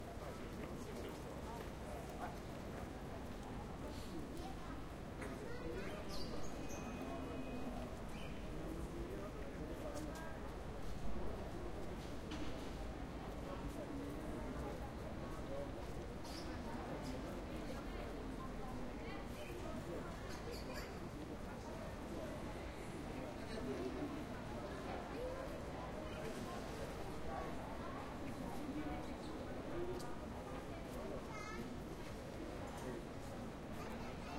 Barcelona street restaurants near Sagrada Família
ambiance, ambience, ambient, atmo, atmosphere, background, background-sound, Barcelona, city, field-recording, memories, noise, outdoors, people, Sagrada, soundscape, Spanish, street, town